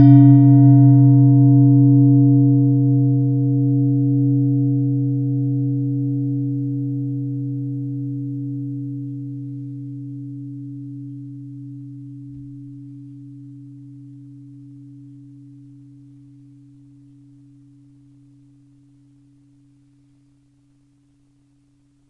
SB 03 deep
Strike massive saw blade (100 - 120 cm) dark
Tool, Blade, Saw, Gong